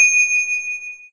Took the end of the ringer from this sound:
Faded it out in Audacity to create a little bell ping.